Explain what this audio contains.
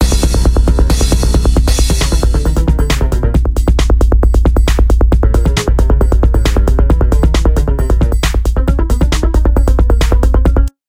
techno beat
beat, RB, sample, soul